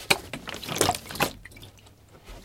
found canister 1
Shaking a canister containing smoke machine liquid